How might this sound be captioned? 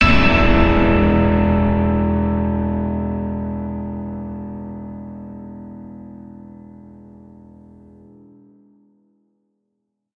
Several octaves of the E note played on a piano at once with some added effects. Created with Soundtrap.